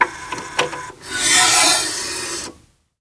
MAC LABS CD TRAY 015
We were so intrigued by the sound that we felt we had to record it. However, the only mics in our collection with enough gain to capture this extremely quiet source were the Lawson L251s with their tube gain stage. Samples 15 and 16, however, were captured with a Josephson C617 and there is a slightly higher noise floor. Preamp in all cases was a Millennia Media HV-3D and all sources were tracked straight to Pro Tools via Frontier Design Group converters. CD deck 'played' by Zach Greenhorn, recorded by Brady Leduc.
c617, cd, close, closing, clunk, deck, drive, hiss, josephson, l251, labs, lawson, mac, mcd301, mcintosh, mechanism, media, millennia, open, opening, player, transport, tray